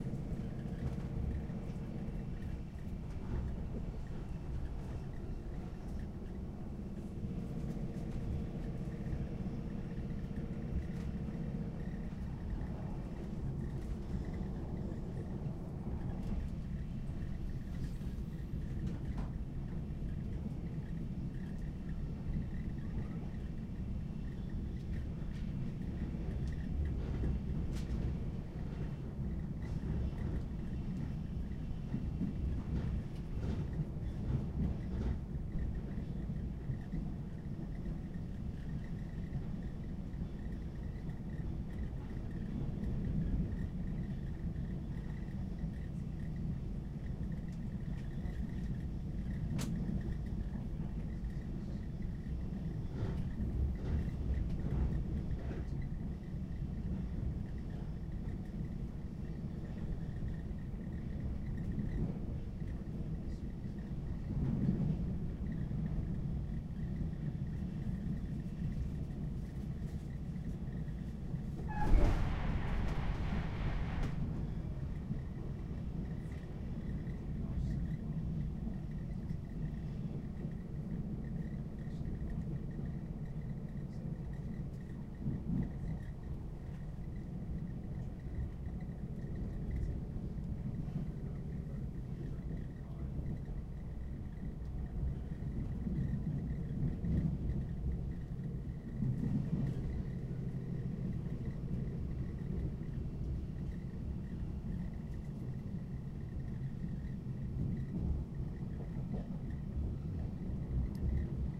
in the train to montpellier
inside train sounds going from Barcelona to Montpelier
field-recording, inside, montpellier, train